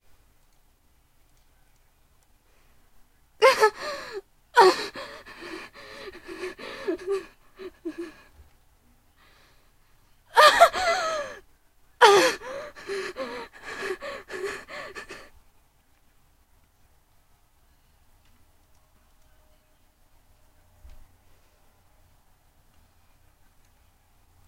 Female stabbed gasp
air, voice